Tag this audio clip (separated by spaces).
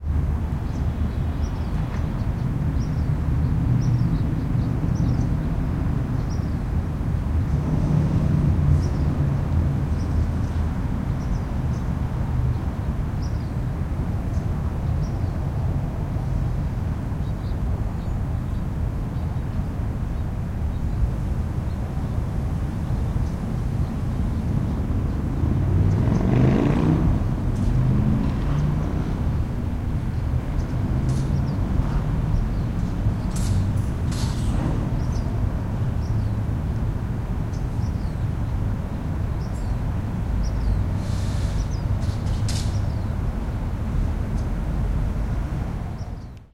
atmospheric background-sound